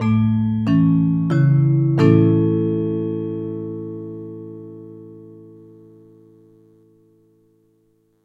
De- and increasing intro for an announcement with chord in the end. Recorded with Yamaha PF-1000 and Zoom H5, edited with Audacity.
airport, announcement, automated, beginning, gong, intro, platform, railway, station, tannoy, train